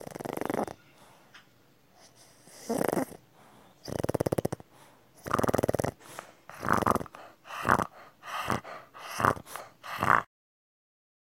ronquido tobby
sleep home dog pug
pug, dog, tobby